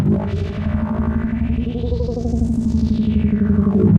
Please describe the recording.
A strange noise, perhaps coming from some alien or futuristic gizmo. Created in Cool Edit Pro.
mechanism, sci-fi, noise